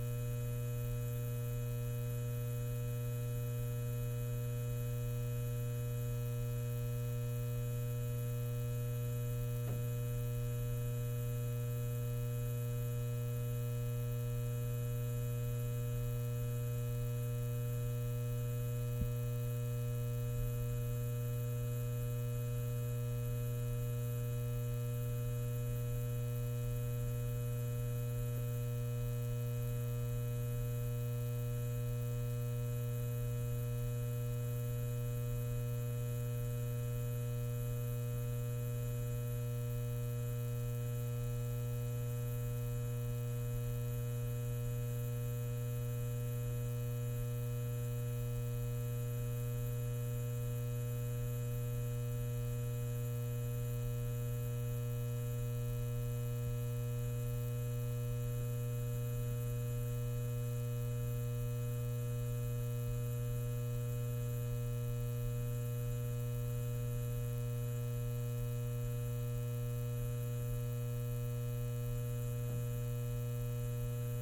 neon sign stereo closeup
sign, neon